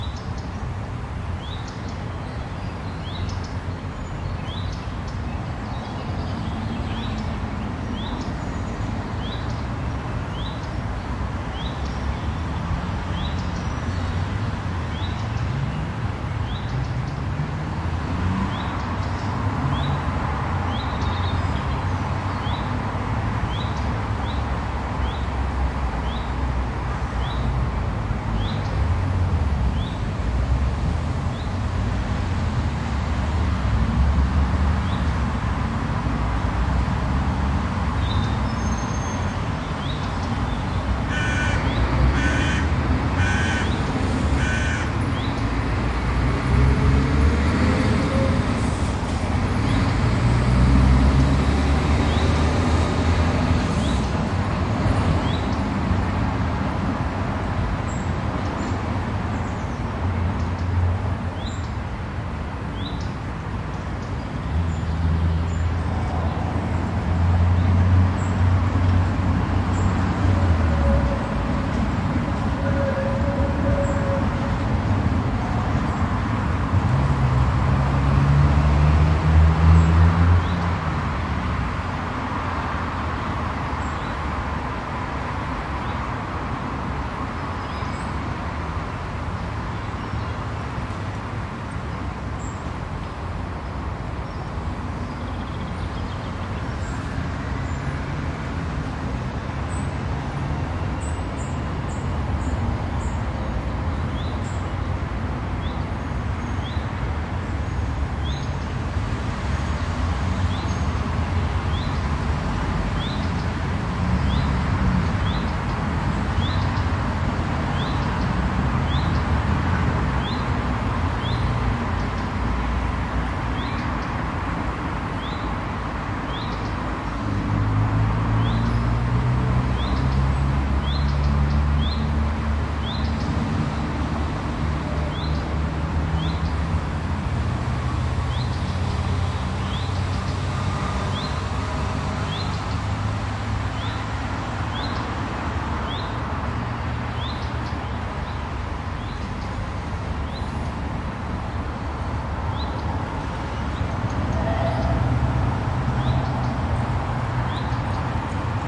140614 LpzParkSchleussigSummer Fringe R
Field recording on the edge of a city park between the boroughs of Lindenau and Schleussig in the German city of Leipzig. It is early morning on a fine summer day, birds are singing, and facing the recorder is a busy street with a tram-line. Lots of cars are making their way towards the city center, trams drive by, pausing at the nearby stop.
These are the REAR channels of a 4ch surround recording, conducted with a Zoom H2, mic's set to 120° dispersion.
ambiance, ambient, atmo, atmos, atmosphere, backdrop, background, birds, city, Europe, field-recording, Germany, Leipzig, park, peaceful, soundscape, summer, surround, traffic, urban